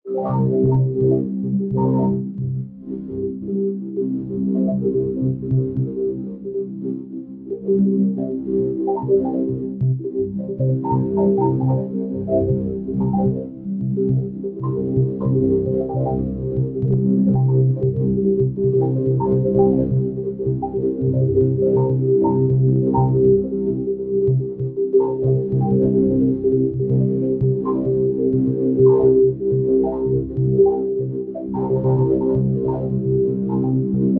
Harmonic Ambience
Stairwell noise with FL Studios Vocodex on it. I did not expect it to sound so nice and music-like.
ambiance, ambience, ambient, atmos, background, background-sound, general-noise, Harmonic, Music, room, soundesign, soundscape, tone, water